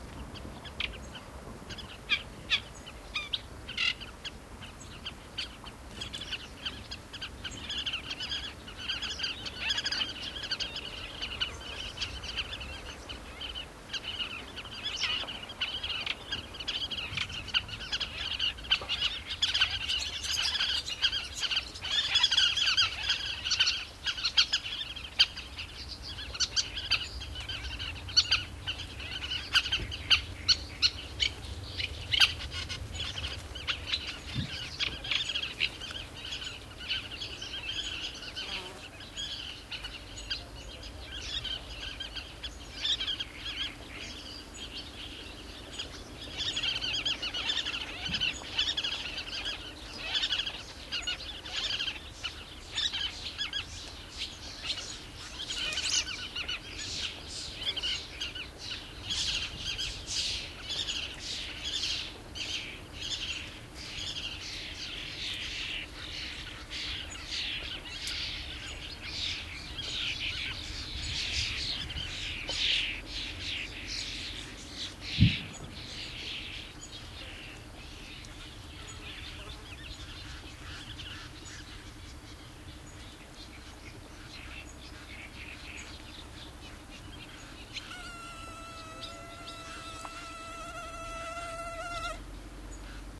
20090628.marsh.ambiance.01
marsh ambiance near Centro de Visitantes Jose Antonio Valverde (Donana, S Spain), with calls from various bird species. Sennheiser MKH60 + MKH30 > Shure FP24 > Edirol R09 recorder, decoded to mid/side stereo with Voxengo free VST plugin
ambiance, field-recording, marsh, nature, south-spain